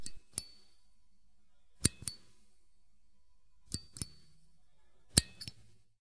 staple-remover-empty
Small staple remover grabs empty air. Metal blades sharply clinging against each other. Multiple takes.
Recorded with a RØDE Videomic from close range.
Processed slightly, a little hiss remains.
office, squeak